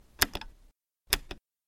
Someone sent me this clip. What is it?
Button Press
Pressing the on/off button on my digital piano.
button, click, press, switch